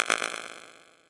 tik delay 03
part of drumkit, based on sine & noise